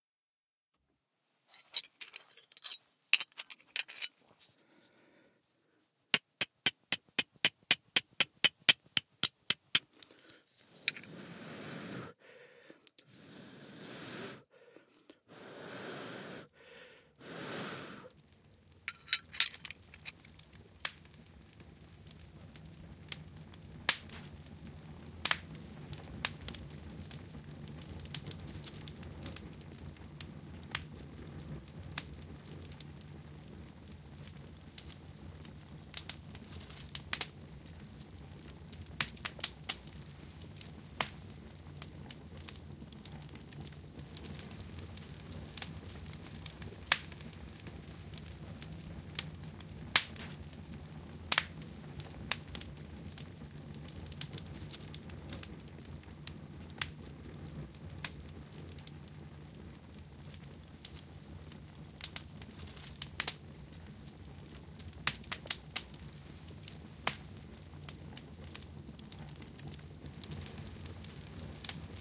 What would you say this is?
Feuer machen
making fire with firestone and steel
fire, firestone, mixed